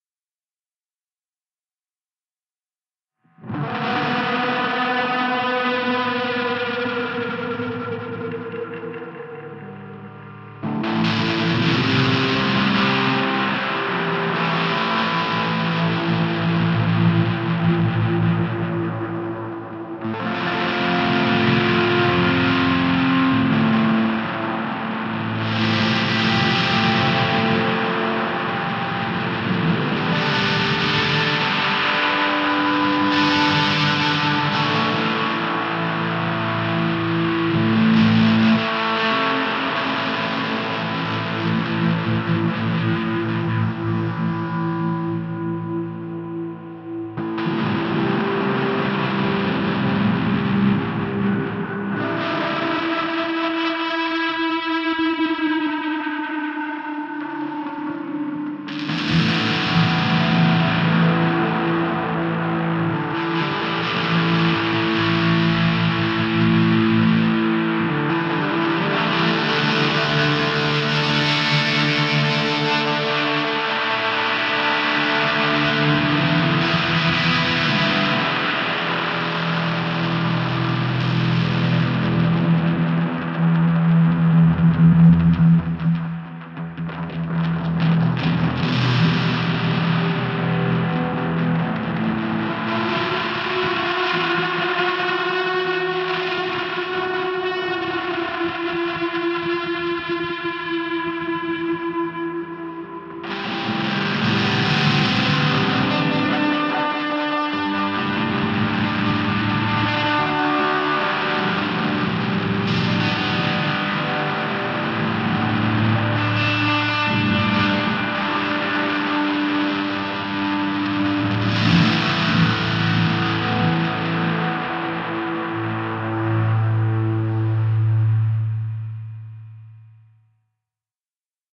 Psychedelic electric-guitar sketch #2
This is second experimental electric-guitar sketch, where I using different effects: overdrive, tremolo, reverberation and delay. Also I creating smooth transition between channels and transiton between frequency in different channels. In finish, released some interesting atmosphere-ambient lo-fi sketch. Well suited as a soundtrack.
noise, electric-guitar, experimental, echo, reverberation, delay, Psychedelic, distortion, soundtrack, sketch, atmosphere, overdrive, ambient, lo-fi